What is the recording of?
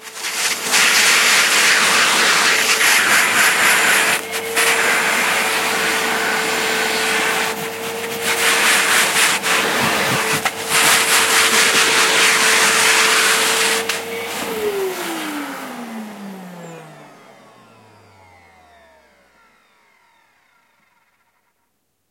vacuum clean
clean, vacuum, floor